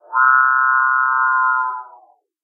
Capuchinbird Ext
An attempt to isolate a Capuchinbird from a file.
request, perissocephalus-tricolor, capuchinbird, xy